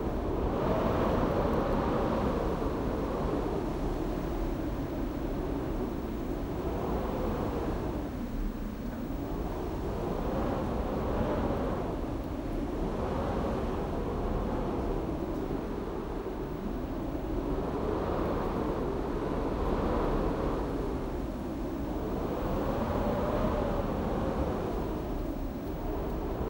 wind draft loop 3
An indoor recording of a strong wind/draft blowing through the window/door gaps, edited to loop seamlessly.
Looking for more sounds and/or music?
air, airflow, ambience, blow, current, door, draft, gap, gust, home, house, household, loop, nature, storm, strong, weather, wind, window